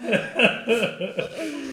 p1 30 lach man
Sound belongs to a sample pack of several human produced sounds that I mixed into a "song".
sound-painting, laugh, mixing-humans, laughter, mixinghumans